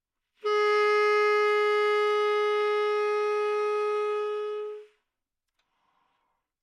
Sax Tenor - G#4
Part of the Good-sounds dataset of monophonic instrumental sounds.
instrument::sax_tenor
note::G#
octave::4
midi note::56
good-sounds-id::5022